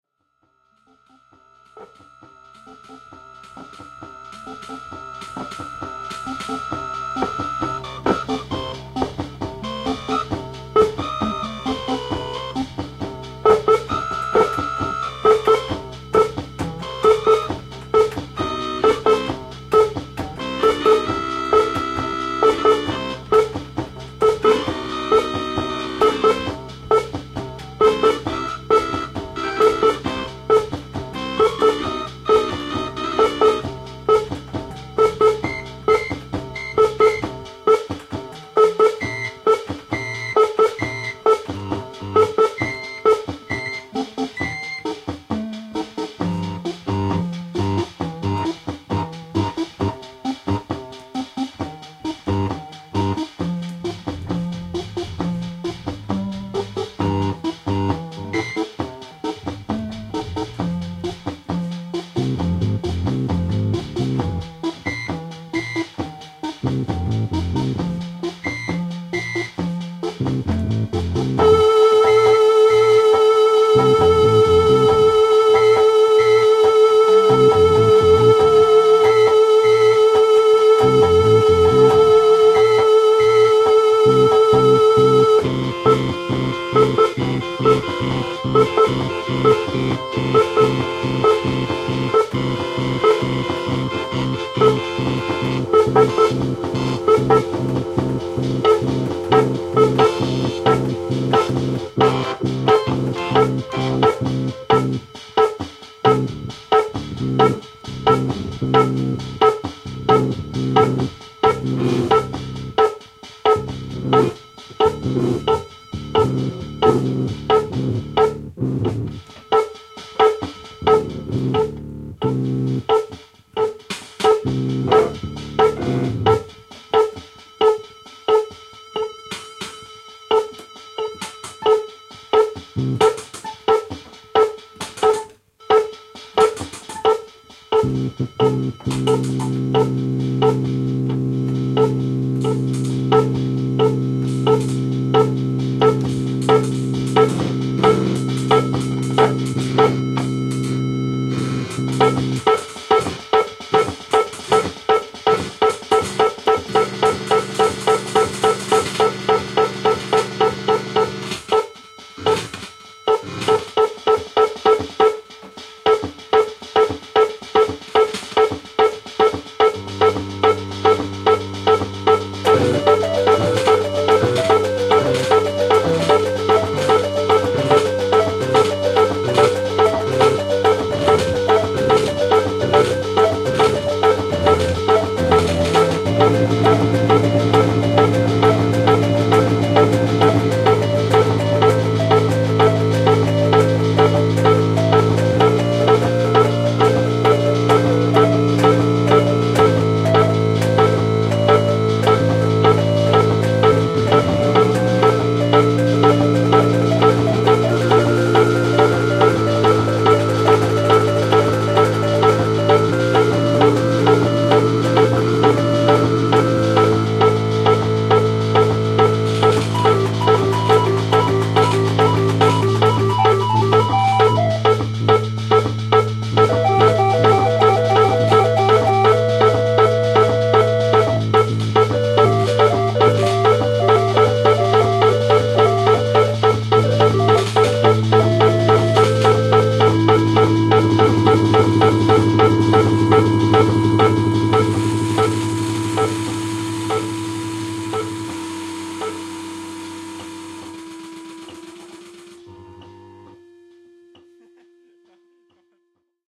Das Ist der Organsound
2 broken organs and 2 lunatics in one room. An experiment gone off the hook.
Detuned, Organs